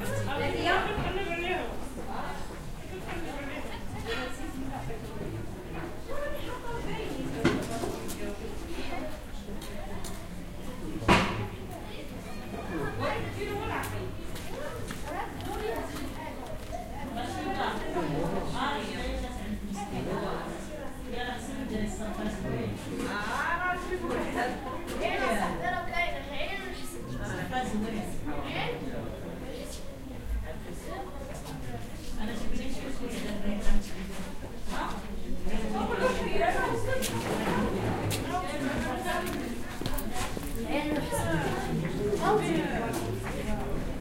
moulay idriss people1
People talking in Moulay Idriss, Morocco